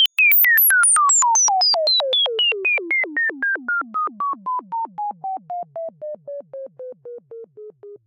bleepy computer fallin' down
bleep, cartoon